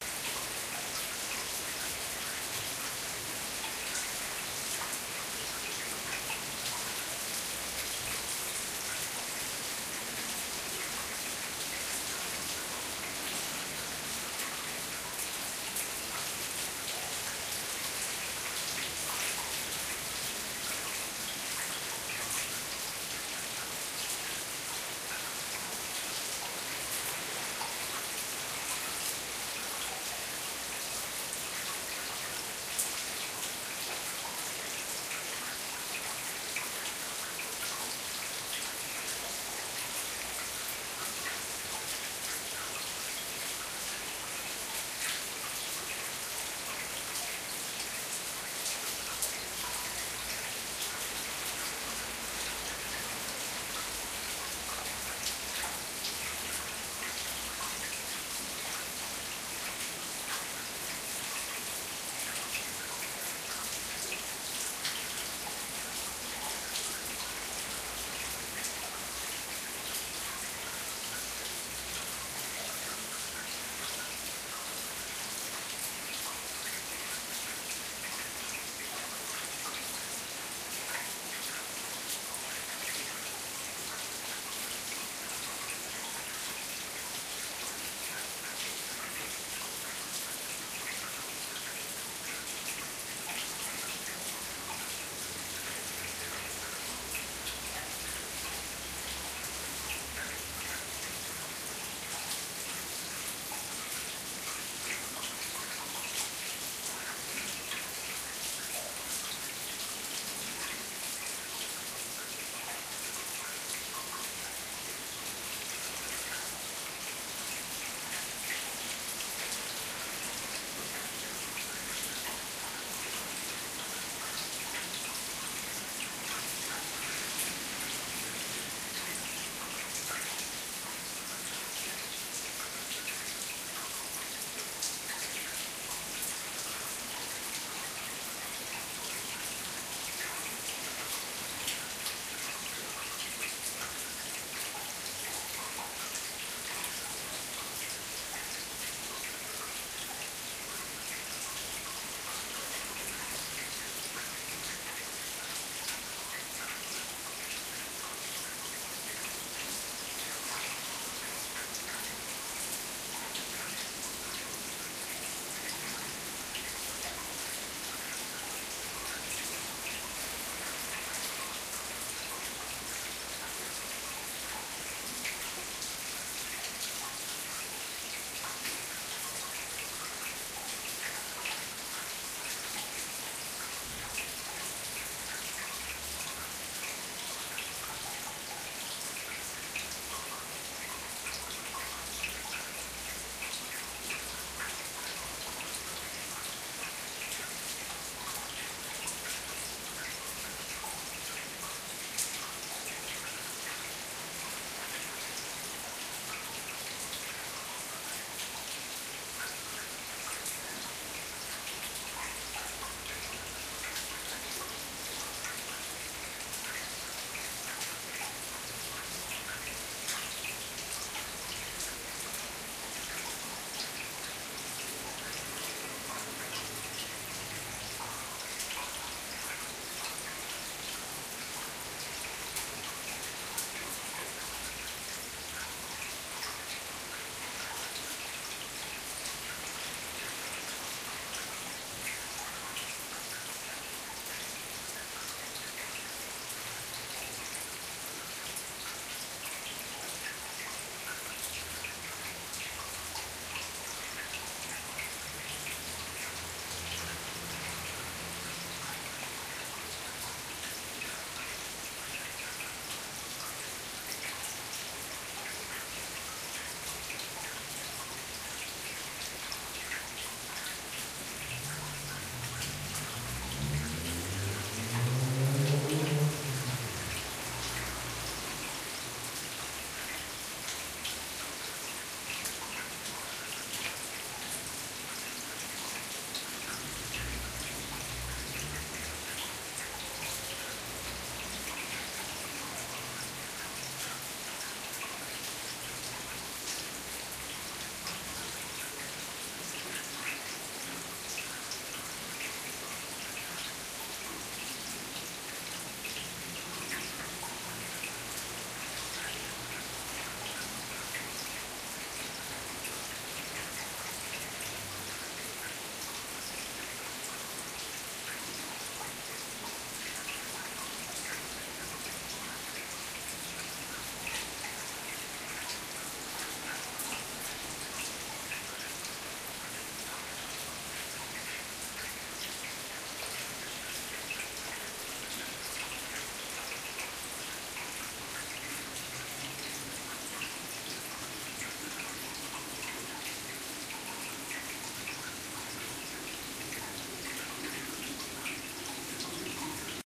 rain night city ambience
recording on a rainy night with some traffic on wet street
bell, cars, church, city, dropping, drops, night, rain, street, train, wet